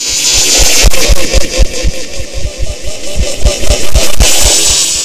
Stab fx for hardstyle, house
Created with audacity
electro, fx, hardstyle, hit, house, stab